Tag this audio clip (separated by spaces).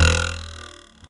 pcb jew-harp effect tech ambient musical trump